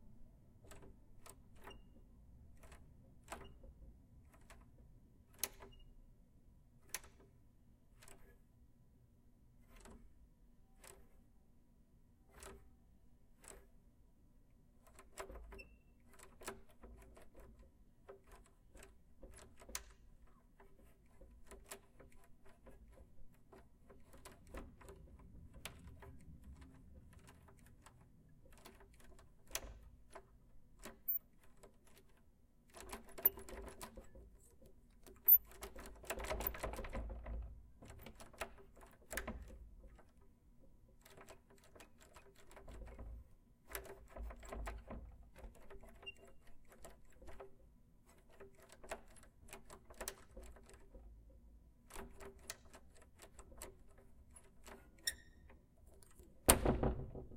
Doorknob various sounds
door, grab